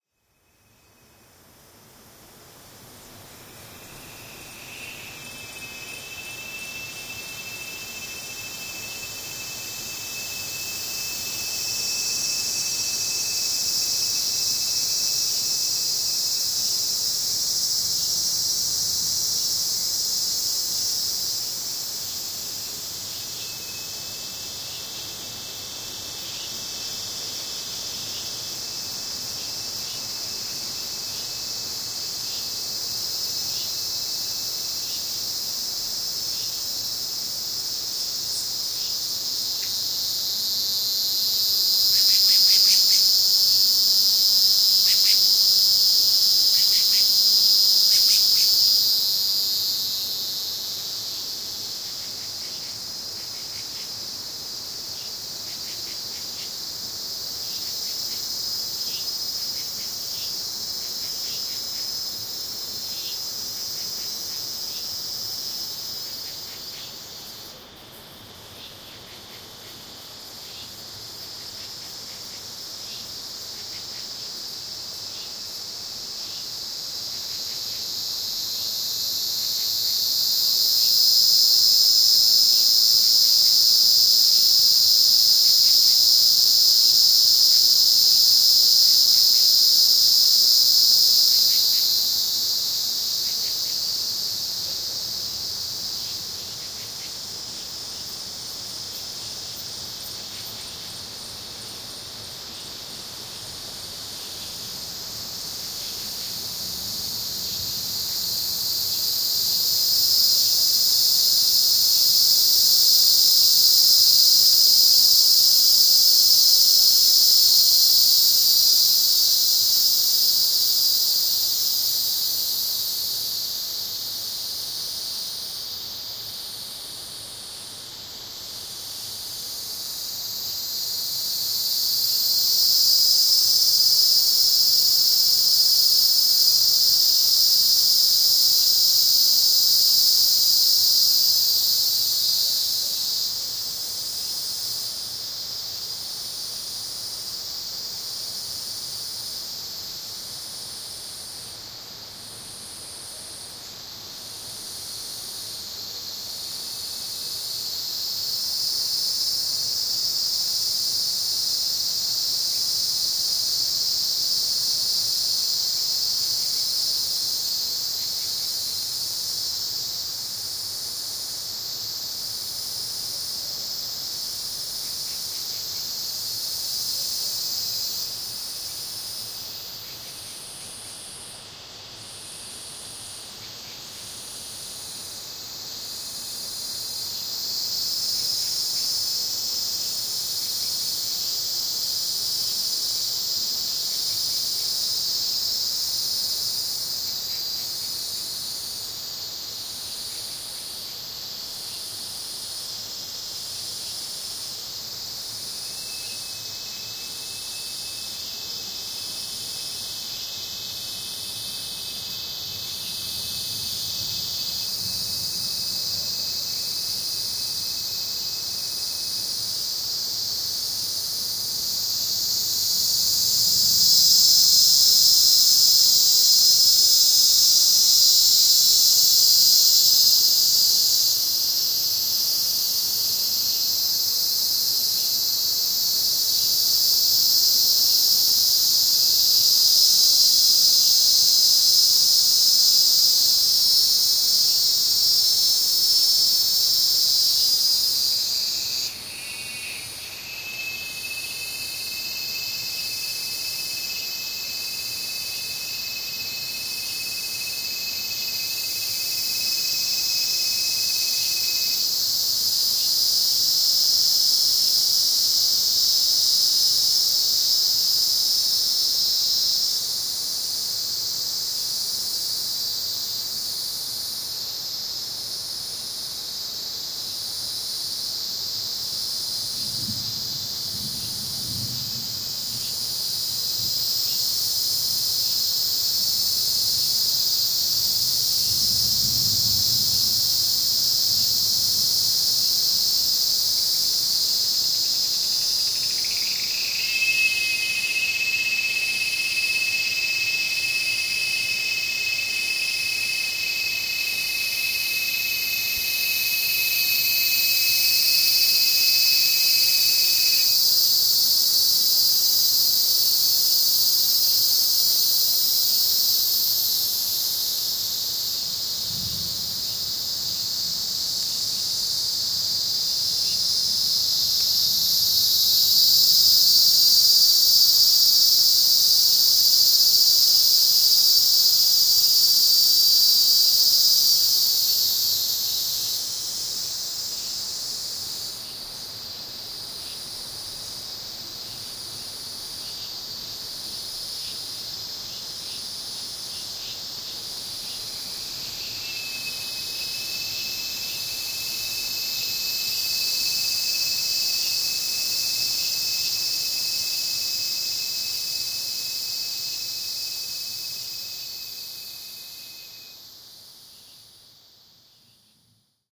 Crickets and cicadas | Nature | Late afternoon
Late afternoon in the interior of Minas Gerais, Brazil.
With crickets, cicadas and waterfalls in the background.
bird, insects, waterfalls, field-recording, birds, rural, cachoeiras, countryside, rio-acima, nature, brazil, crickets, forest, brasil, tangara, morning, minas-gerais, belo-horizonte, cicadas